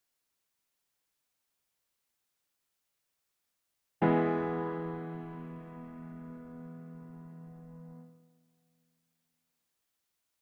Piano Chord - This is a piano chord.
note, music, chord, piano